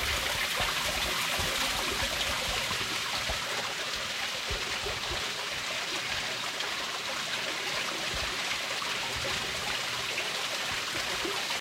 Water flowing into a drain 2
Recorder water going into a drain from a small fish pond. Can be useful also as a stream sound or small waterfall. (Check out my music on streaming services too - search for Tomasz Kucza.)
babbling, brook, creek, drain, flow, flowing, liquid, river, splash, stream, water, waterfall